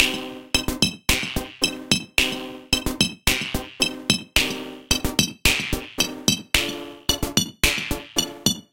GHOST-bounce-rythm
i like that thing
made with Ghost Synth exacoustics (shoutout to the beta testers and devs)
expirimental, idm, percussive, rythm